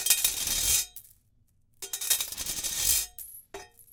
Chainlinks on metal